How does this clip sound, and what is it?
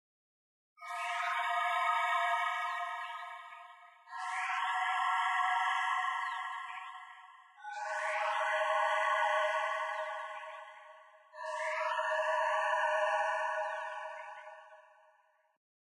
ambient, tense soundscapes and rumbles based on ambient/soundfield microphone recording inside a running train.
ambience, atmosphere, cinematic, dark, eerie, electronic, intro, metro, noise, processed, reverb, rumble, sci-fi, soundscape, strange, subway, theatre, train